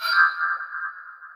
Fantasy ui Button 1
Fantasy_ui_Button ui chimes crystal chime bell fairy sparkle jingle magic ethereal tinkle spell airy sparkly